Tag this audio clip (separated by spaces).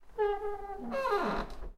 creak normal squeak wooden door